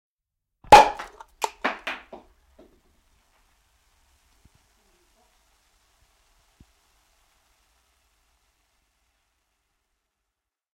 Pullo, korkki auki, kuohuviini / Fizz cork popping, opening a bottle, pop, hiss
Kuohuviinipullon korkin aukaisu, poksahdus, korkki lattialle, sihinää. Samppanjapullo.
Äänitetty / Rec: Analoginen nauha / Analog tape
Paikka/Place: Yle Finland / Tehostearkisto / Soundfx-archive
Aika/Date: 13.06.1980
Yle, Aukaisu, Cap, Open, Poksahdus, Cork, Bottle, Field-Recording, Suomi, Auki, Soundfx, Yleisradio, Champagne, Finnish-Broadcasting-Company, Fizz, Finland, Kuohuviini, Tehosteet, Pop, Korkki, Pullo, Samppanja